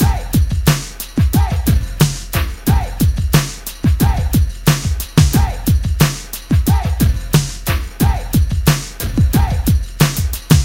Drumloop 'Wey' - 4 bar - 90 BPM (no swing)
Made with HammerHead Rhythm Station and a classic hip-hop sound ("break!")
100-bpm, beat, big-beat, bigbeat, break, breakbeat, drum, drum-loop, drumloop, drums, funky, groovy, hip-hop, hiphop, jungle, loop, rap, rhythm